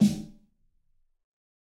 Fat Snare of GOD high tune 018
Fatter version of the snare. This is a mix of various snares. Type of sample: Realistic
drum, fat, god, high, realistic, snare, tune